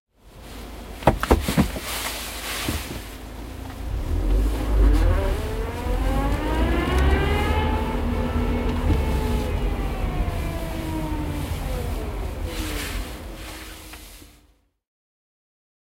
Backing with VW Polo, H2n inside car. Sorry for my winter jacket sound.